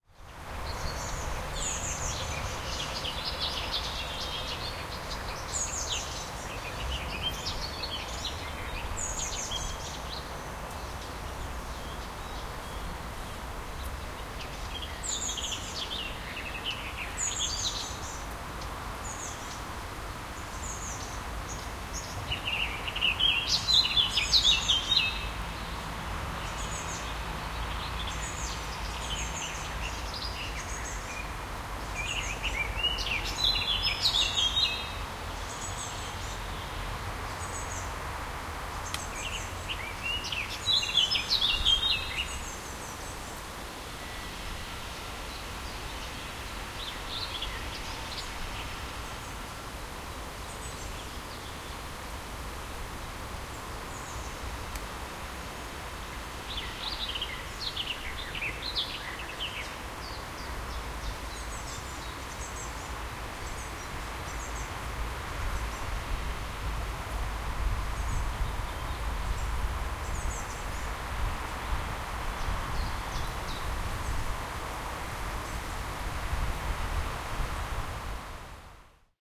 birds singing in the front with traffic and industrial noises in background
sitting at a river with a street in the back. some traffic is going. lots of birds singing
birds, lots, nature, summer